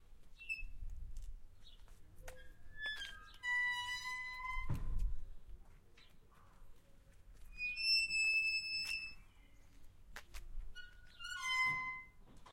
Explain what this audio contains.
Rusty church gate
Opening and closing a rusty gate into a graveyard
Recorded with ZoomH5
squeak, rusty, squeaky, open, gate, close, church